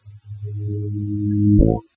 Sounds like the jaws theme song bass sound. I then removed the unbelievable noise to see what I ended up with. After that, I cut out the parts that sort of sounded cool and these are some of the ones I am willing to let everyone have.